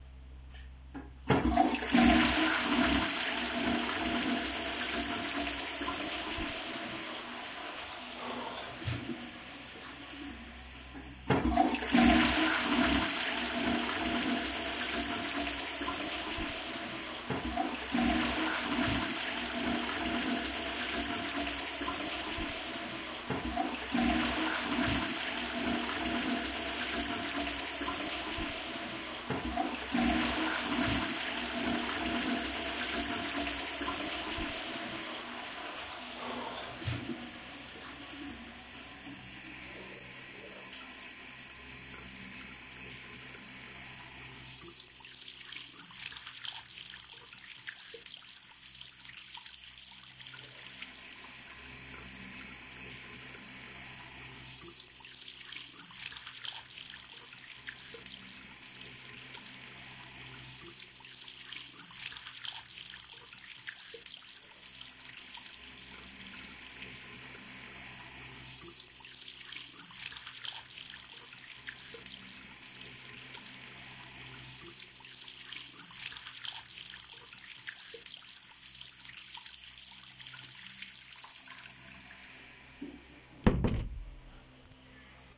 Toilet in the bathroom sequence
An entire bathroom sequence, recorded in the bathroom at my campus. Flushing a toilet.washing my hands (water tap sounds) and then closing a door. I increased the volume on closing a door because the original recording was very soft.
sequence, system-Loo, flush-Bathroom, Flushing